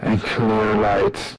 This song called BaReBass SUB2 is about 2 friends who will get married soon. In that occasion, I wanted to created something loungy with a magical kind of text.
The title is created from the two lovebird-names and the track will be included on the album (Q2-2011) "Subbass Terrorist".
Clean-cut samples!
Enjoy and please give some feedback when you like! Thanks!
dj, acapella